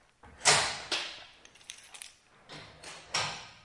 War room doors
from inside a 1960's Canadian Emergency Government Headquarters or "Diefenbunker"
Binaural recording using CoreSound mics and Marantz PMD 661 48kHhz
metal, door, rolling